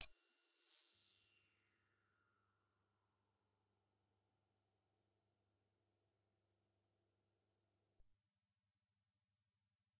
DDRM preset #14 - Eb9 (123) - vel 90
Single note sampled from a Deckard's Dream DIY analogue synthesizer that I built myself. Deckard's Dream (DDRM) is an 8-voice analogue synthesizer designed by Black Corporation and inspired in the classic Yamaha CS-80. The DDRM (and CS-80) is all about live performance and expressiveness via aftertouch and modulations. Therefore, sampling the notes like I did here does not make much sense and by no means makes justice to the real thing. Nevertheless, I thought it could still be useful and would be nice to share.
Synthesizer: Deckard's Dream (DDRM)
Factory preset #: 14
Note: Eb9
Midi note: 123
Midi velocity: 90
multisample, deckardsdream, synth, analogue, Eb9, ddrm, single-note, midi-velocity-90, synthetizer, cs80, midi-note-123